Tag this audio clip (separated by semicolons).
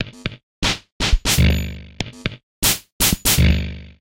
Abstract; Percussion; Loop